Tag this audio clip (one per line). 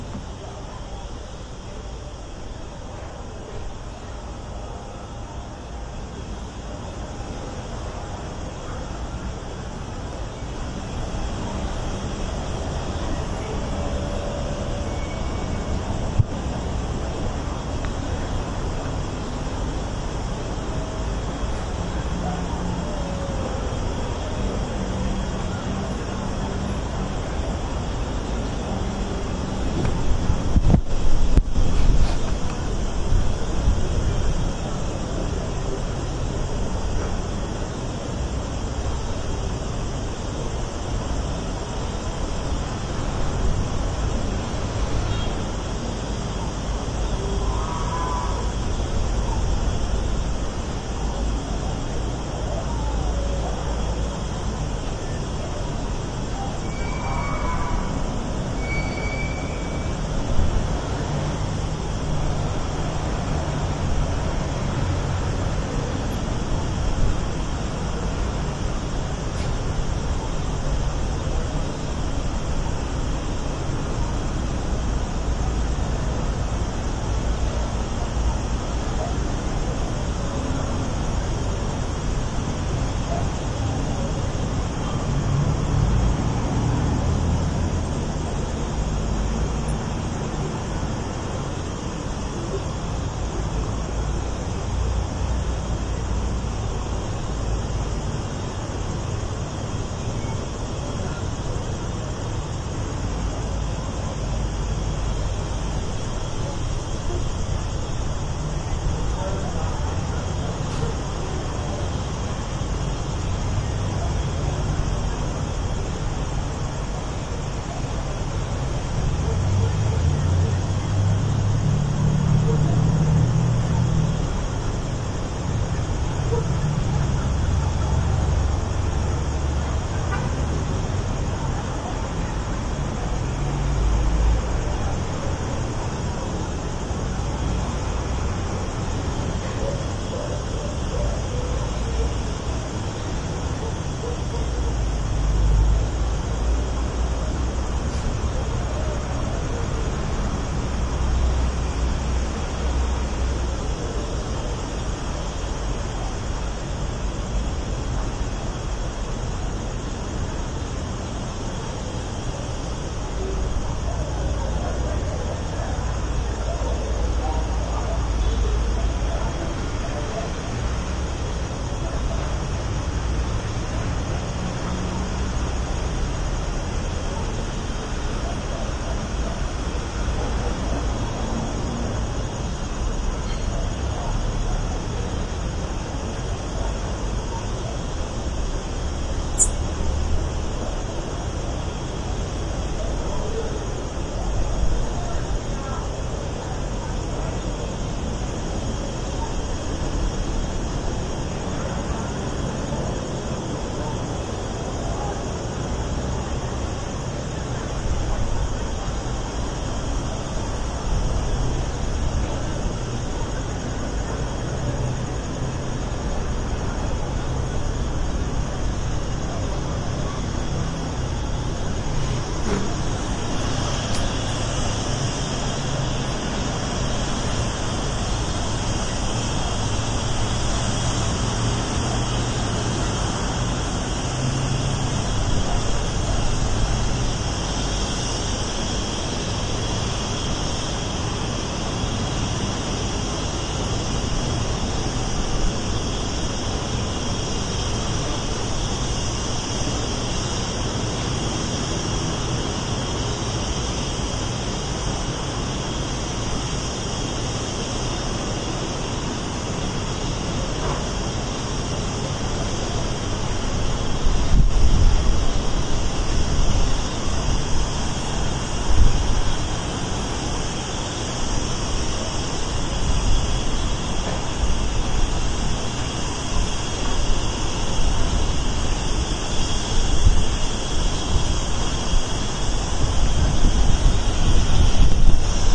ambience
mexico
outdoor
outdoors